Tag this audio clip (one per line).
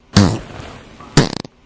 explosion
fart
flatulation
flatulence
gas
noise
poot
weird